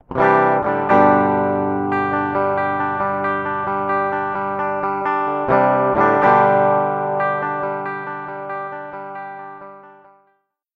Clean Strumming & Arpeggio
A short musical phrase played on an electric guitar. The phrase includes some strumming and arpeggiation of chords.
Recorded for the purpose of testing out guitar DSP effects.
Recording details:
Gibson Les Paul Junior, P90 pickup, Mahogany neck, Ernie Ball Beefy Slinky 11-54, Dunlop 88mm.
Recorded through the instrument input of a Focusrite Saffire Pro 24.
Edited in Ableton Live, no processing other than gain and fade.